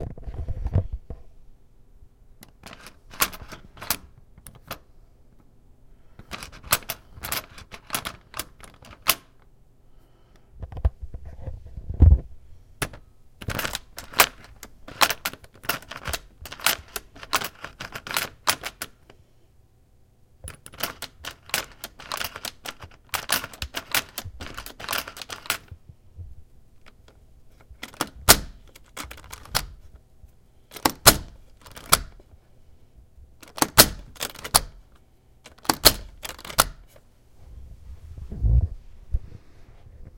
Door Handle and Lock
Jiggling the handle of a door handle. Also, locking and unlocking deadbolt on same door. Indoor. Recorded on Zoom H2
handle, door, field-recording, dead, deadbolt, bolt, lock